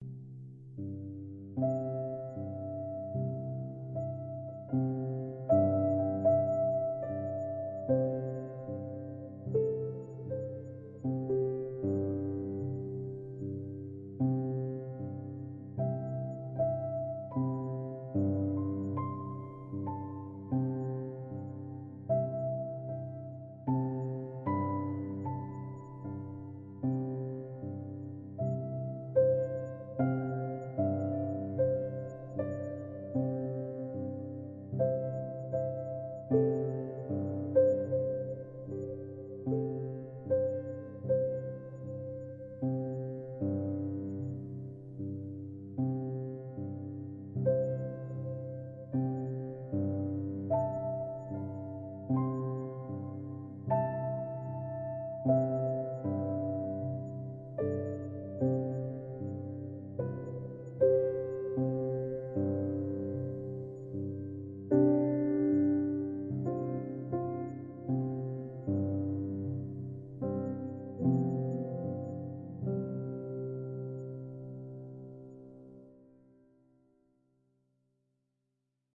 C MAJOR-suft piano - midnight hotel
Imagine the hotel lobby at midnight
around the world
Speechless :-)
my original composition in C major scal.
recording in cubase 5.2
with HAlionone piano synth.
and lots of reverb.